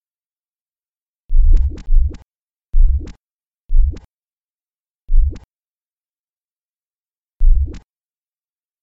SF Battle
My digital attempt at a phaser fight.
fight, battle, space, phaser, sf, space-battle, guns